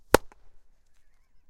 Glove Catch 5 FF010
1 quick glove catch, medium to high pitch. hard smack.
catch, glove-catch